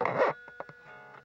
Electric guitar noise
Atonal and miscellaneous electric guitar sounds played through an Orange Micro-crush mini amp.
mini-amp, distortion, amplifier, electric, guitar, orange